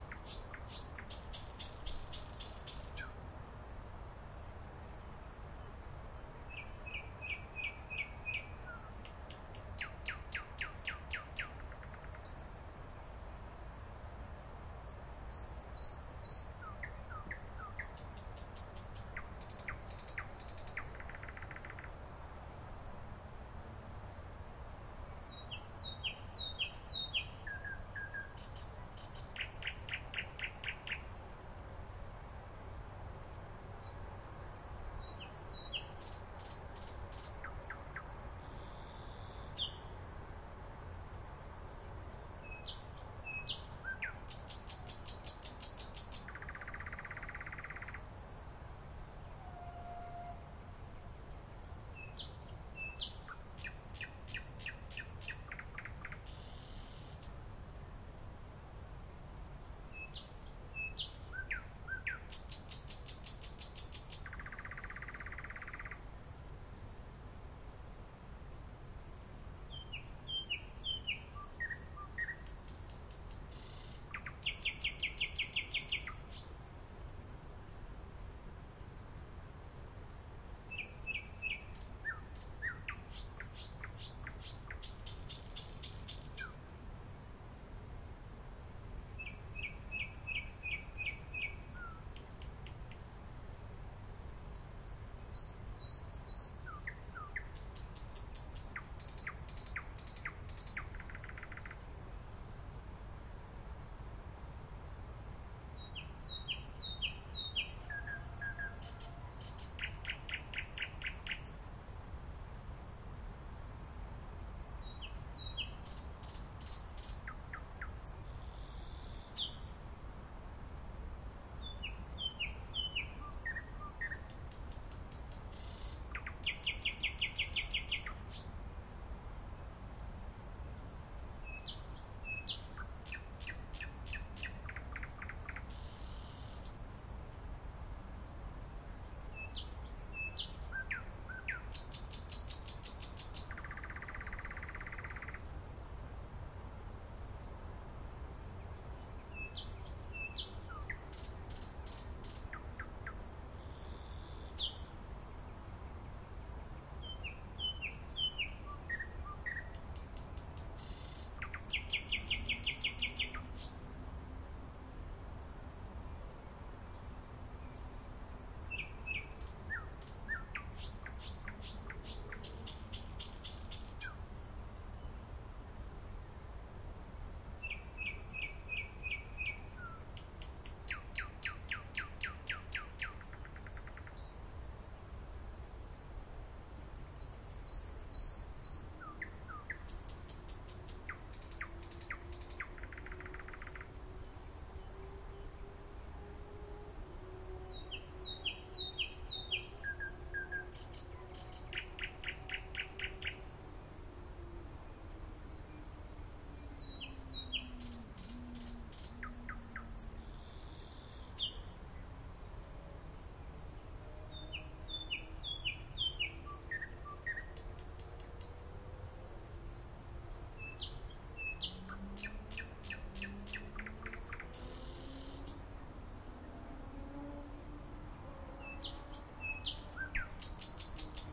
XY Tsaritsyno spring night park nightingale distant-traffic
Ambience of night Moscow park. Nightingale and distant traffic.
Recorded on the ZOOM H6 (with XY mic).